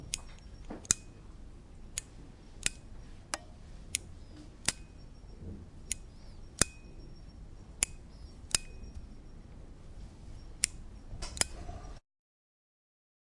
Light switch sound.